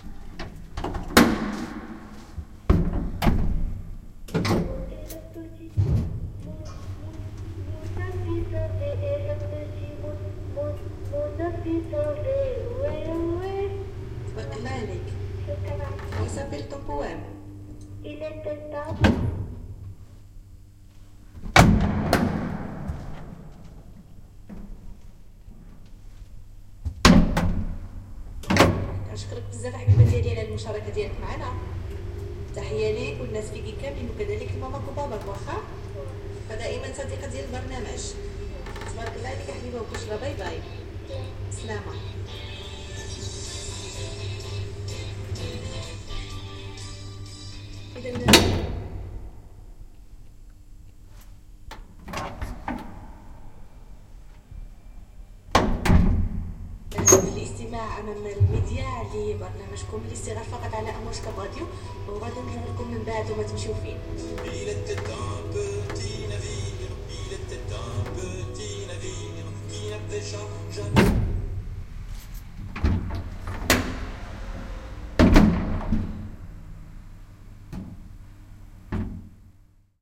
Tangier's best elevators in are all equipped by a radio…
Zoom H2 + Soundman OKM II
Tangier, Morocco - january 2011